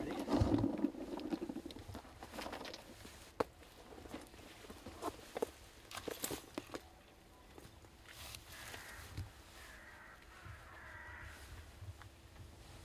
A shooter rustling shell cartridges in bag and taking side-by-side out of it's sleeve ready for the shoot to begin.